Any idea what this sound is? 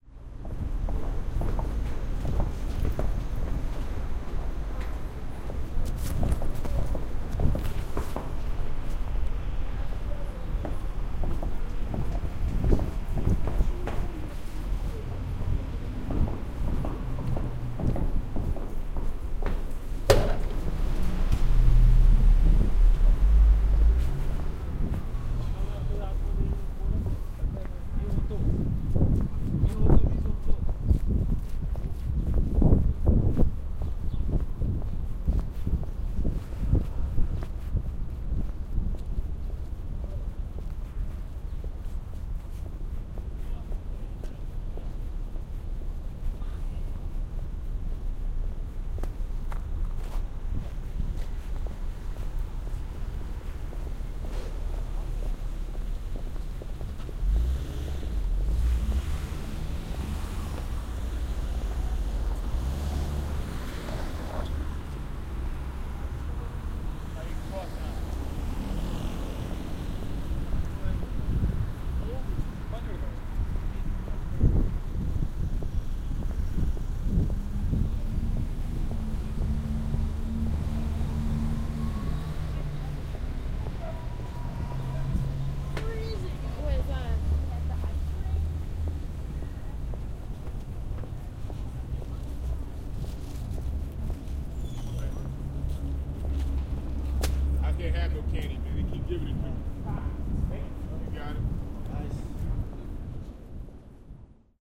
Walking from NJIT parking deck to Kupfrian Hall on campus.
newark, sidewalk, city, njit, ambience